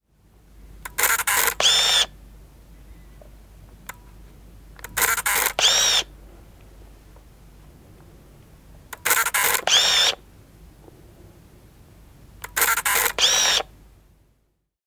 Kamera, taskukamera, pokkari / Camera, photo camera, small pocket camera, automatic, film, shots, shutter, click, interior, a close sound (Samsung-Zoom)
Pieni taskukamera, muutama laukaus automaattiasetuksella. Sisä. Lähiääni. (Samsung-Zoom).
Äänitetty / Rec: Analoginen nauha / Analog tape
Paikka/Place: Suomi / Finland / Helsinki, studio
Aika/Date: 15.12.1995